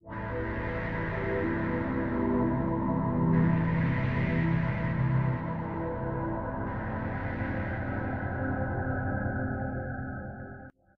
Layered pads for your sampler.Ambient, lounge, downbeat, electronica, chillout.Tempo aprox :90 bpm
ambient, chillout, downbeat, electronica, layered, lounge, pad, sampler, synth, texture